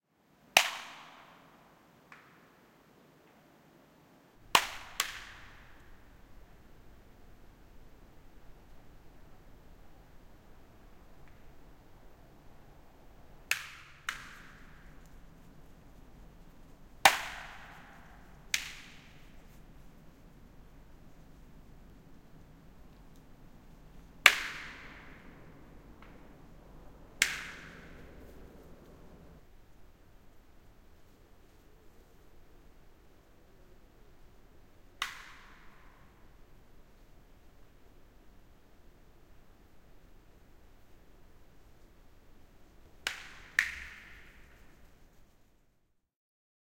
Pakkanen paukkuu puissa / Freeze, frost, snapping, popping in the trees
Puut naksuvat, paukkuvat pakkasessa. Hiljaista metsän huminaa.
Paikka/Place: Suomi / Finland / Ähtäri
Aika/Date: 21.04.1994